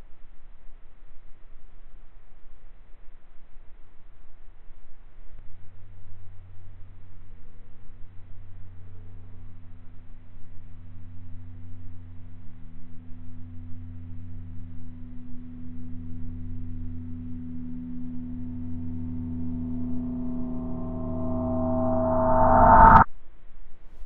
reverse bowl 09 remix
It sounds a little spooky, like something is going to happen. Edited in 1.3.5-beta. I added a little equalization, a little flanger and reversed it.